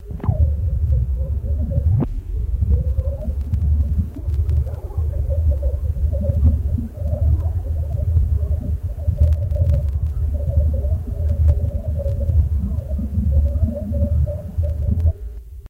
Ghostly Trance Background of a Female Begging.